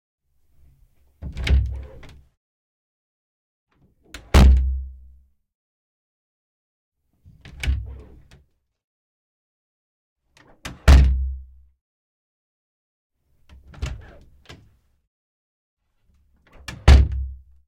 Door open and close interior x3
Some of the best interior door foley I've ever recorded. Very clear, close-up sound of a wooden door smoothly swooshing open, then clunking shut 3 times.
Recorded on Zoom H4n.
clear, click, close, clunk, door, doorknob, foley, open, swoosh, turn, turning, wood